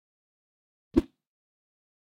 High Whoosh 06
woosh, whip, whoosh, swoosh